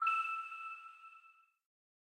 Synth Texture 4
A short, dainty sting.